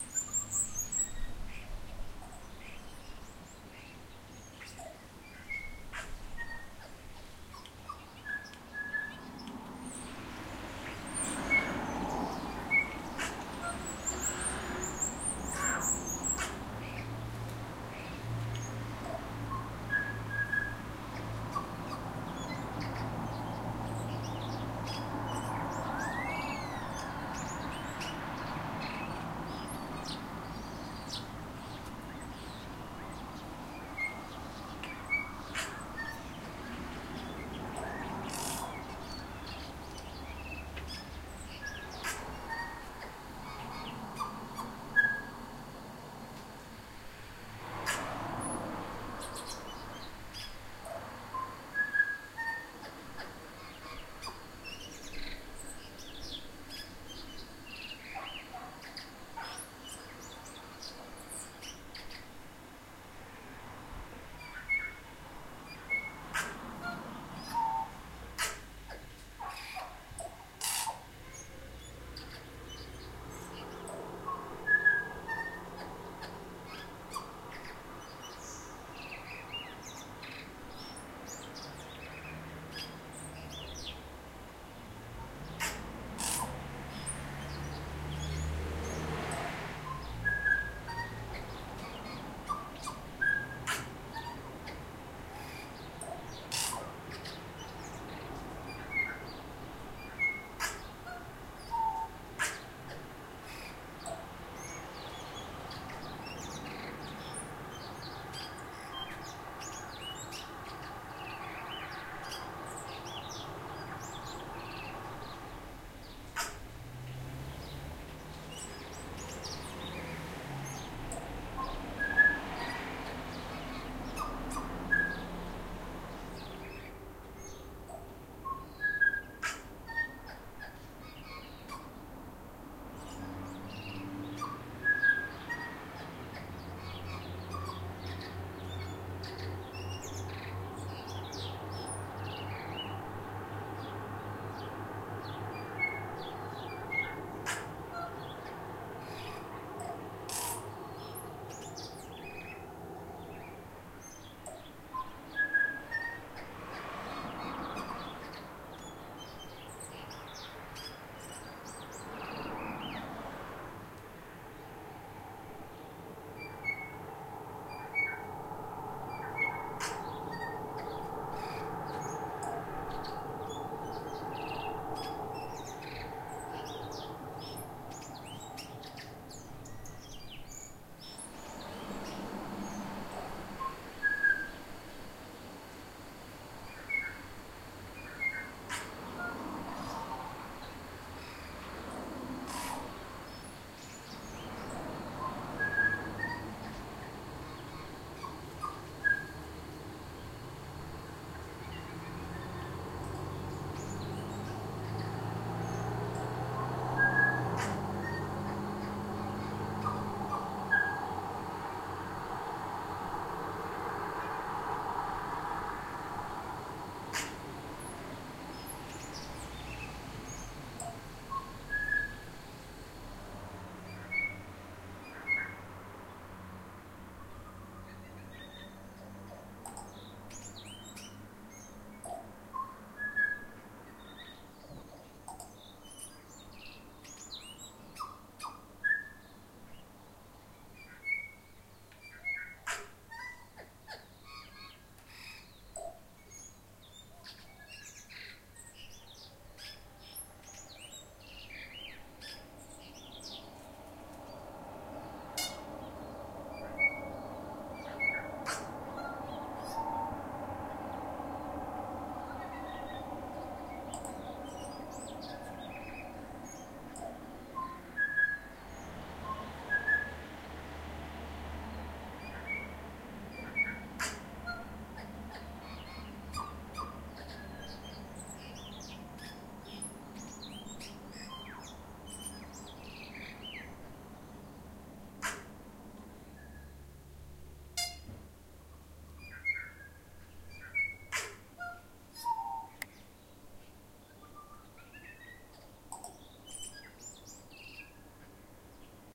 Tui - New Zealand bird
This is a recording of a tui, a New Zealand bird.
It was recorded on the deck of a house in Remuera - a suburb in Auckland, New Zealand.
The house is near a road, so cars can be heard in the background.
The tui was in a bottlebrush tree next to the deck.
Recorded on 16 May 2012.
Recorded with Zoom Handy Recorder h4n.
Any questions? Please email Sarah: